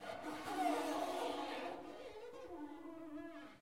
The sound produced by moving the fingers into a bathroom sink. It was recorded in the auditorium of the university.